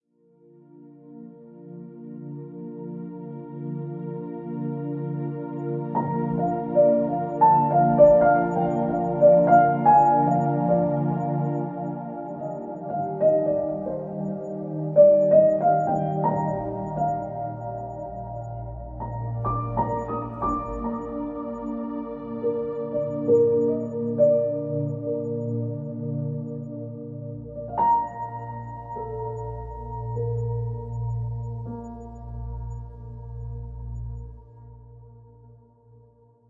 Soundscape - Last 31 - Cinematic Piano
ambient, dramatic, soundscape, mellow, melancholic, melody, pad, chill, movie, chillout, relaxing, instrumental, mood, cinematic, calm, texture, sad, film, soundtrack, slow, soft, reverb, background, minimal, piano, atmosphere, drone, classical, deep, music